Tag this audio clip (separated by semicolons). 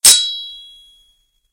samurai; Japanese; Japan; blade